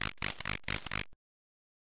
A short electronic noise loosely based on winged insects.